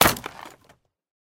Crate Break 4
Breaking open a wooden crate.